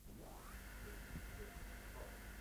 16Track-Tape Machine Whir Up + Drummer Count In